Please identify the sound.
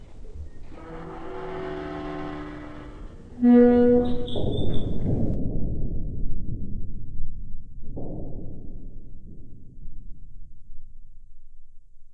Sub Sul FONDO
Submarine touching bottom of the ocean. Something slow heavy and metallic sit in its final position, creaks, metal stress, muffled sound.
bottom, metallic, muffled, ocean-heavy, touching